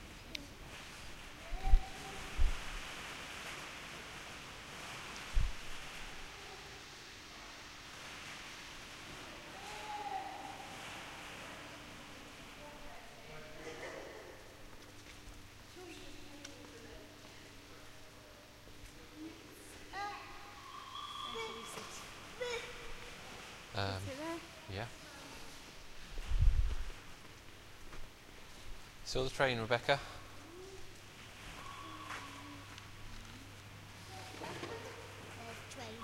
Hall at Chatham Dockyards